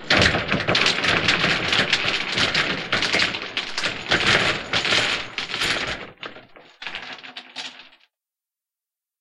Large collection of blocks falling. Original is a large pile of Jenga blocks on a hollow wood floor, with lots of bass reinforcement added for good luck. Sounds like a few hundred blocks falling.